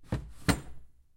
Drawer Open
cabinet, open, foley, wardrobe, drawer